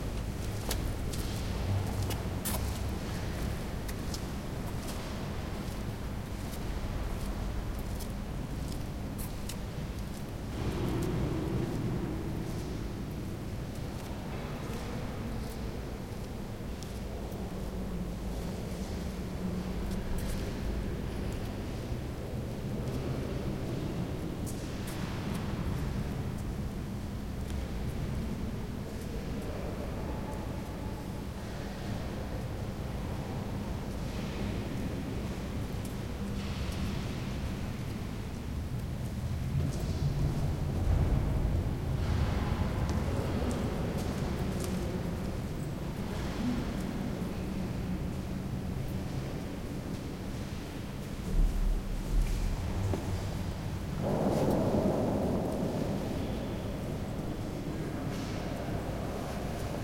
08 Worms Cathedral main transcept
These recordings were made during a location-scouting trip I took some time ago to southern Germany, where we had a look at some cathedrals to shoot a documentary.
I took the time to record a few atmos with my handy H2...
This is an atmo of the Worms Cathedral's main transcept.